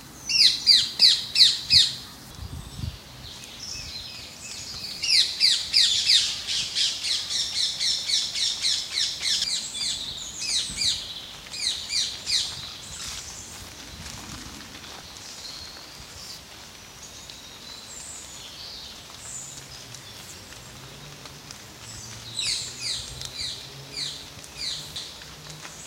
oiseaux Parc Heller
Birds recorded at the Heller park (Antony, France)
nature, birdsong, bird, field-recording